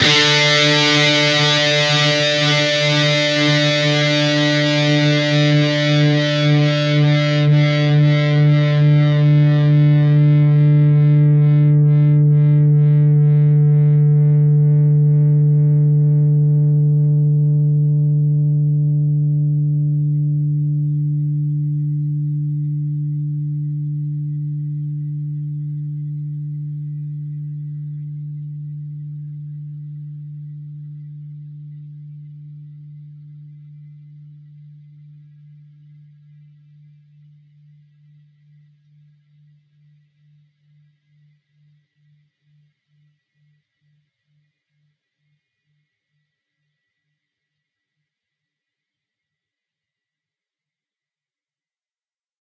Dist Chr D oct up
D (4th) string open, G (3rd) string, 7th fret. Up strum.
chords, distorted, distorted-guitar, distortion, guitar, guitar-chords, rhythm, rhythm-guitar